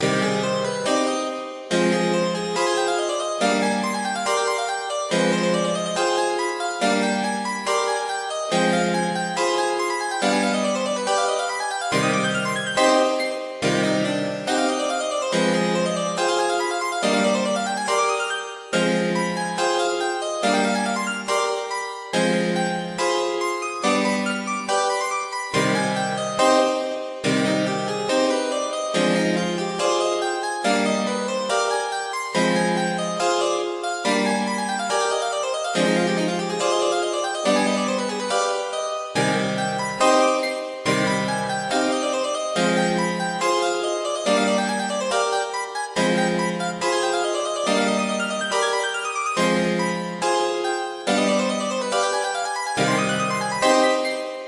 This is a harpsichord solo I wrote for one of my songs, in fact, the same one as the flute solo. More or less another baroque loop.